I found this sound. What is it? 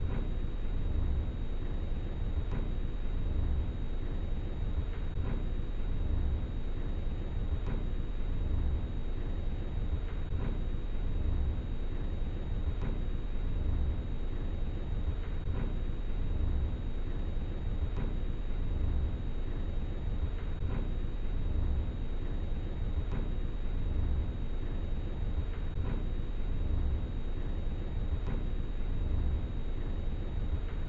Ambient Sound 2
Suspense, Orchestral, Thriller